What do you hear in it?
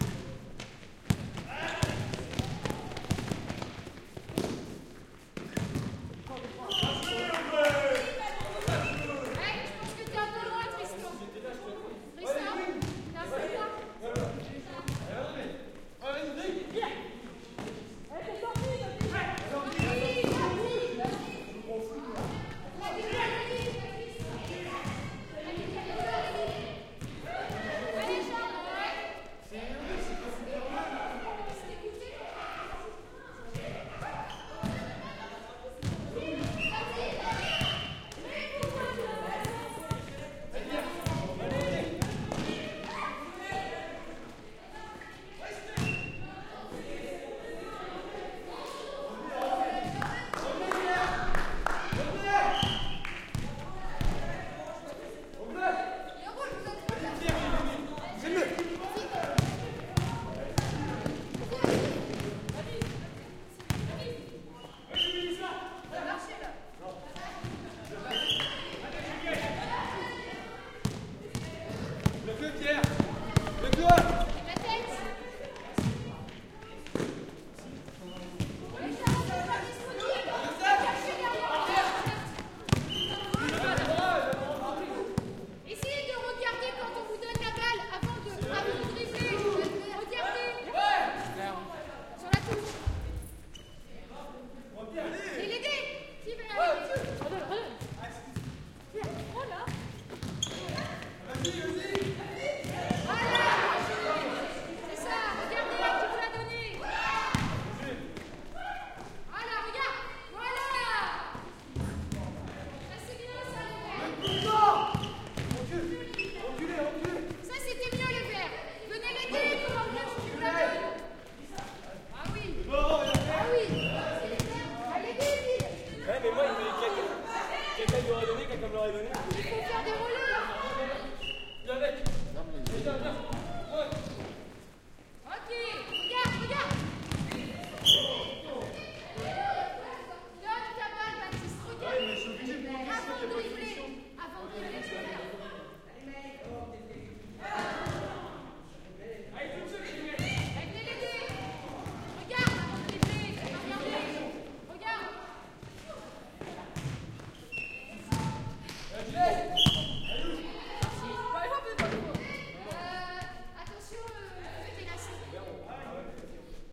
Queneau Basket 05
cour de sport jeu de basket dans un gymnase
basketball, game, gymnase, people, sport